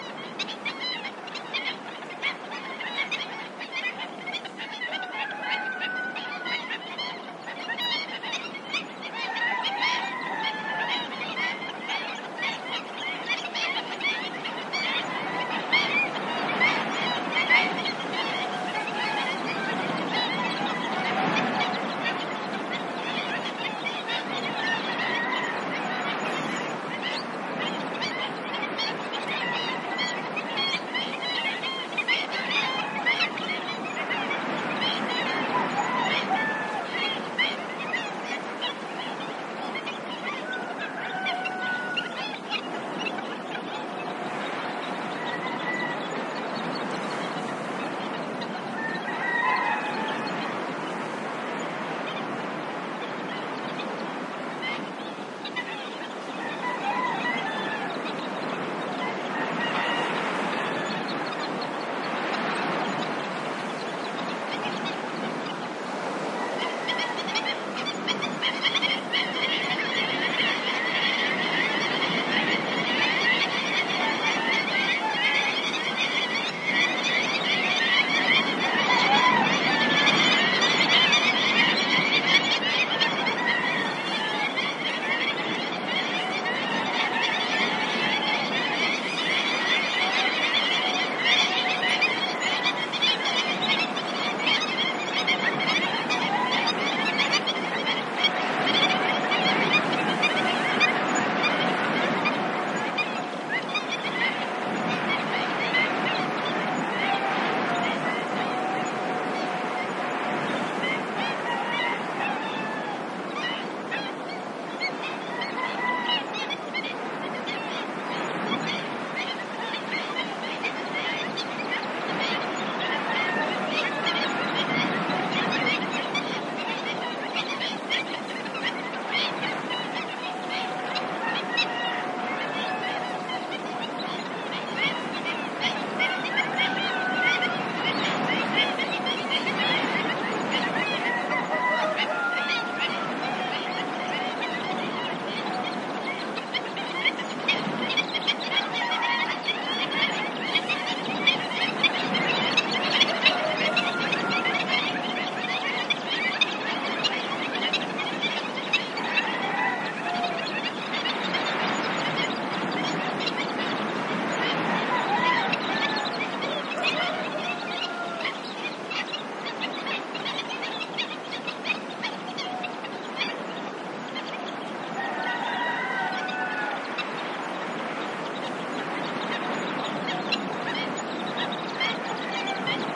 wind noise and bird calls: a large flock of Black-winged Stilt trying to shelter from VERY strong wind. Occasional cockerel crowing. Recorded at Centro de Visitantes Jose Antonio Valverde (Donana marshes, S Spain) using Sennheiser MKH60 + MKH30 - protected by Rycote Windjammer - into Shure FP24 preamp, Sony PCM M10 recorder. Decoded to Mid-Side stereo with free Voxengo VST plugin